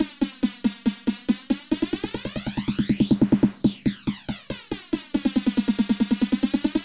snare build with flange fx